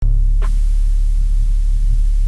res out 03
In the pack increasing sequence number corresponds to increasing overall feedback gain.
automaton, chaos, computer-generated, feedback-system, neural-oscillator, synth